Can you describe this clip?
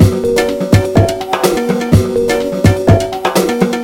vst slicex combination + pattern multi sample
cut final loop with soundforge 7